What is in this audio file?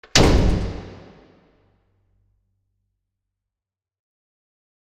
Big circuit breaker 1-2.With reverb of a large club(mltprcssng)
Sound effect of a large circuit breaker. Consists of 6 layers. Added large room space.
I ask you, if possible, to help this wonderful site (not me) stay afloat and develop further.